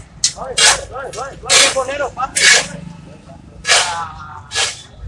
Registro de paisaje sonoro para el proyecto SIAS UAN en la ciudad de Palmira.
registro realizado como Toma No 06-voces 1 suave que es bolero parque de los bomberos.
Registro realizado por Juan Carlos Floyd Llanos con un Iphone 6 entre las 11:30 am y 12:00m el dia 21 de noviembre de 2.019
06-voces, 1, bolero, es, No, Of, Paisaje, Palmira, Proyect, que, SIAS, Sonoro, Sounds, Soundscape, suave, Toma